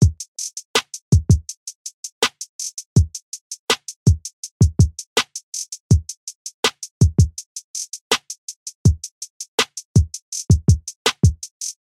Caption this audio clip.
Hip-Hop Drum Loop - 163bpm
Hip-hop drum loop at 163bpm